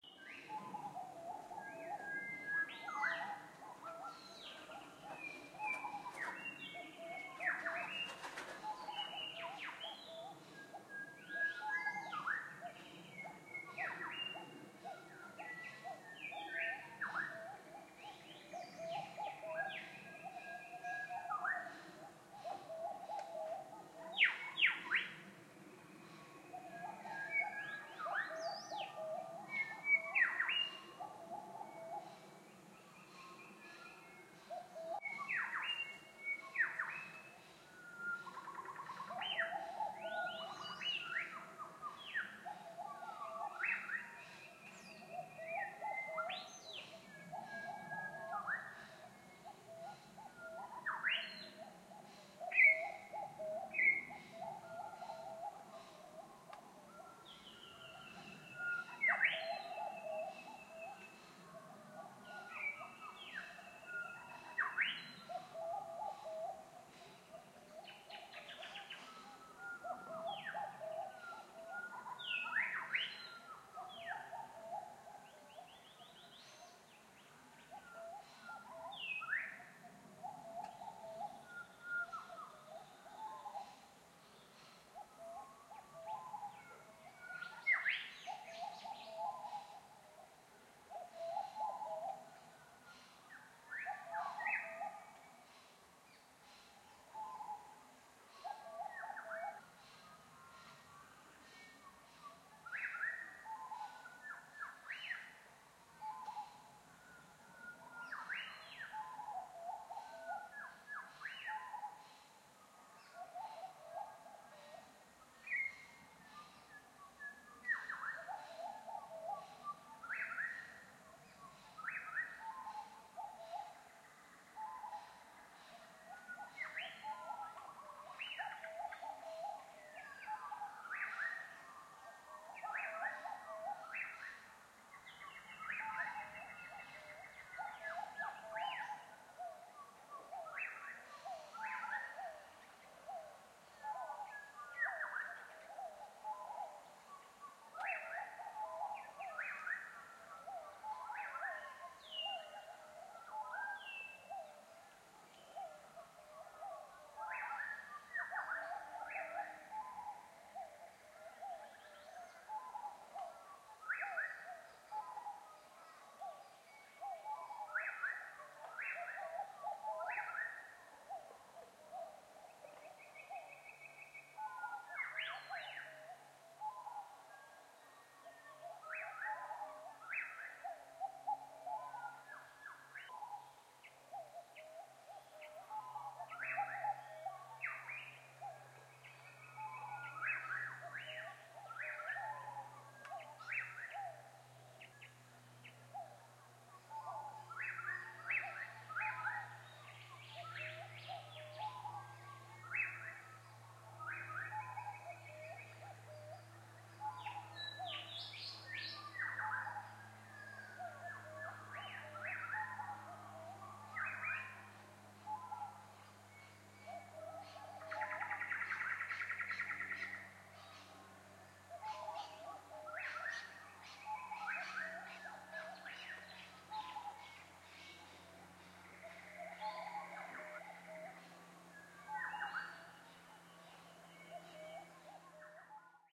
Morning birdsong recorded on a ZOOM H5 & Azden SMZ-30 at 06:30 in April (Autumn in south-eastern Queensland)